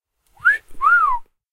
Wolf Whistle
A generic wolf-whistle for all your lechery needs.
wolf-whistle, zoom-h4n, catcall, whistling, whistle, cat-call